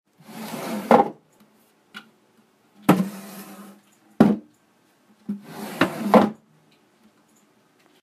Sliding Wooden Chair
This sound of a wooden chair being dragged out from (and back into) a desk.